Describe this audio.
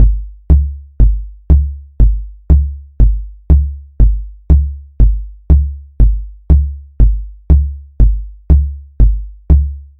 Using the programme Audacity,Typical samba surdo pattern.